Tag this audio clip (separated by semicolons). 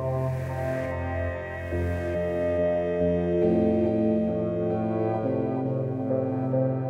electronica; ambient